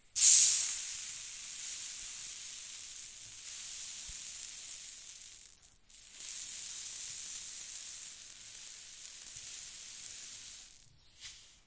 mp sand
Fine sand trickling to the ground
sand, table, pile